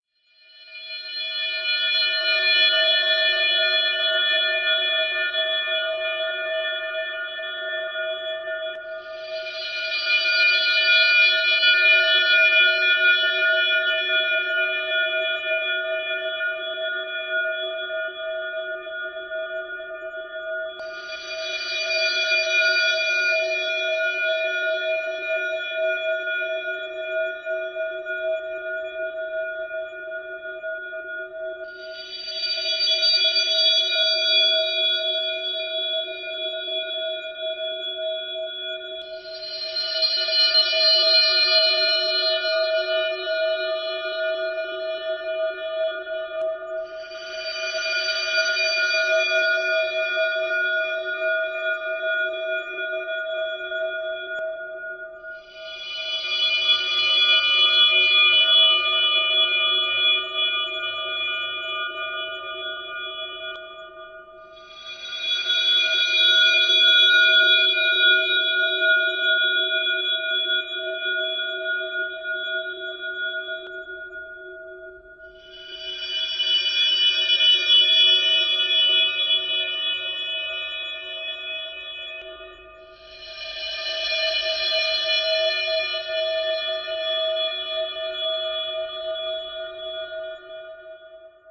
Drone 3 [triangle]
This intense drone sound clip was edited from an original triangle recording.